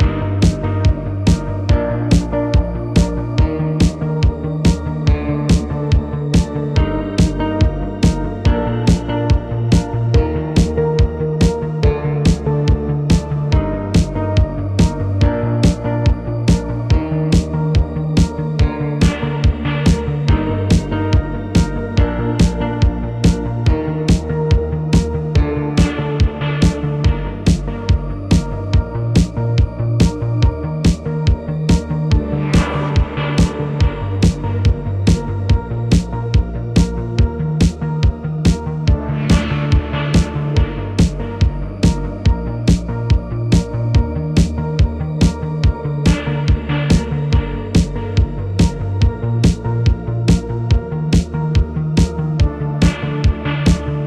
Angel-techno pop music loop.
bass, beat, club, dance, drum, drumloop, electronic, kick, kickdrum, loop, melody, music, original, pad, phase, pop, progression, sequence, strings, synth, techno, track, trance, vintage